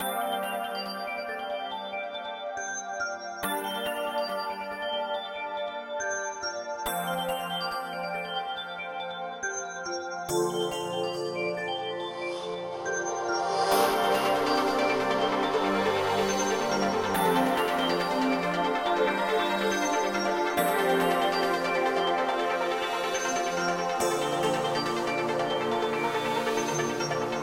Just like surfing stars in a dream
ambience, ambient, atmos, atmosphere, background, EDM, Magic